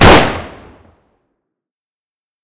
Single Pistol Gunshot 3.3
A better, snappier version of my "Single Pistol Gunshot 3.2" SFX. Created with Audacity.
Gunshot, Pistol, Revolver